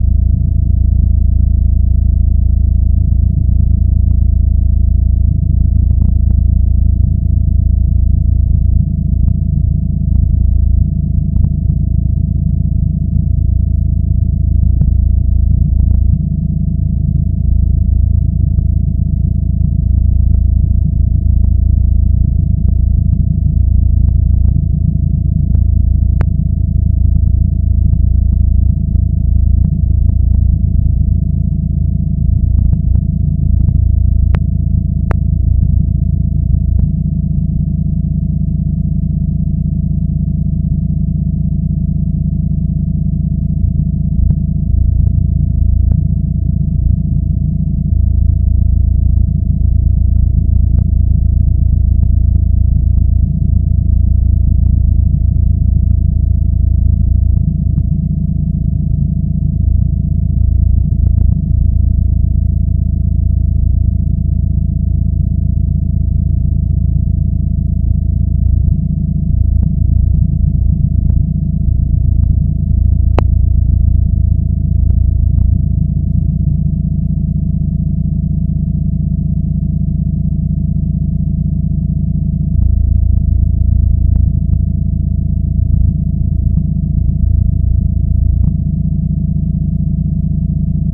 system 100 drones 10
A series of drone sounds created using a Roland System 100 modular synth. Lots of deep roaring bass.
bass, synthesizer, drone, bass-drone, modular-synth, oscillator, analog-synthesis, vintage-synth, low, ambience, Roland-System-100